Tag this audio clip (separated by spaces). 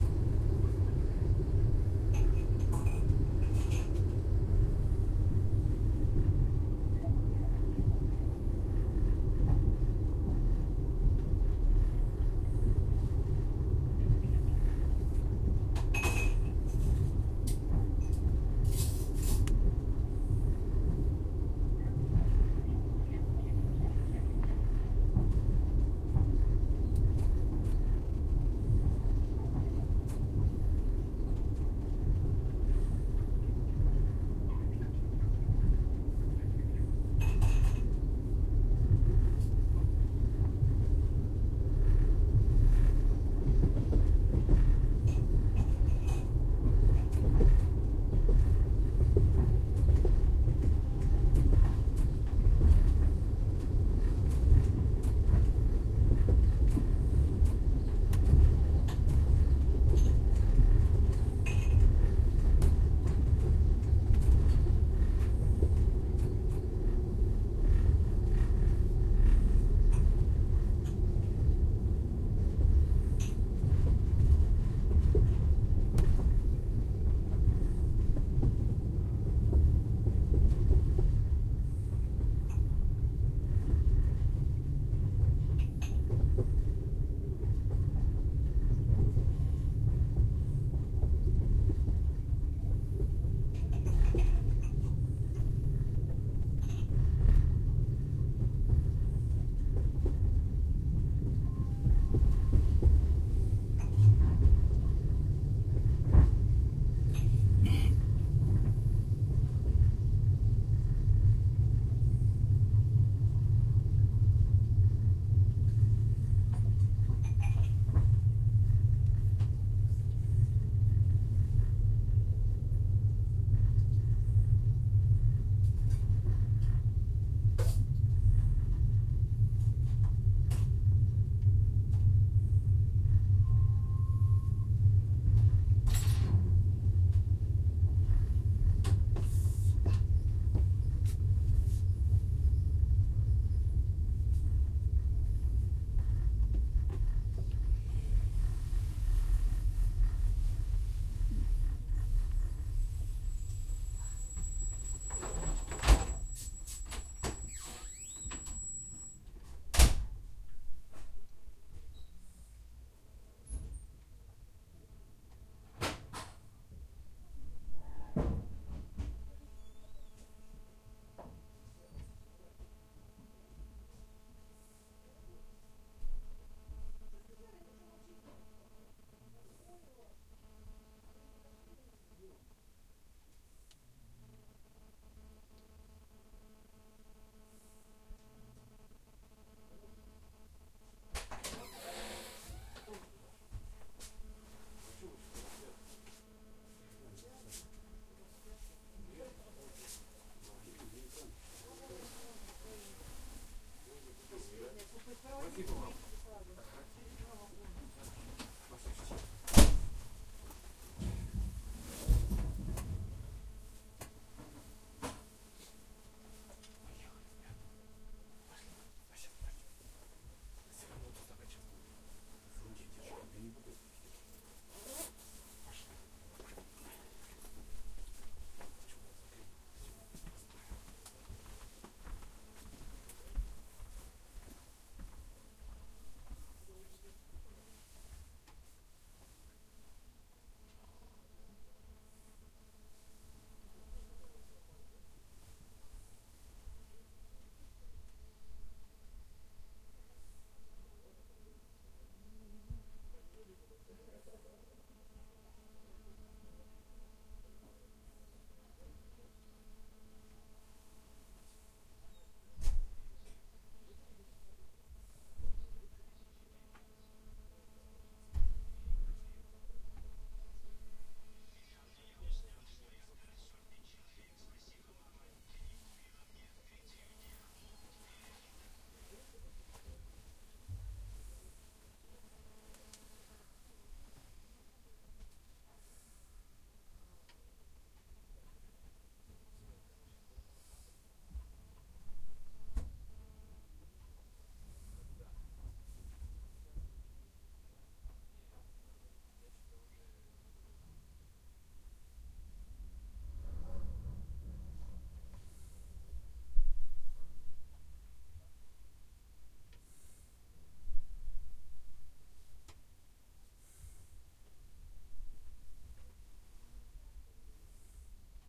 clank conductor dishes night noise passenger passenger-wagon railway rumble train travel trip wagon